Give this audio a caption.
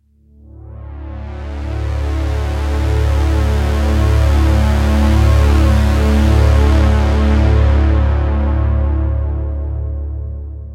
c#aflat-PulseLongADSR
Pulse/Swell made with Juno-60 Syntehsizer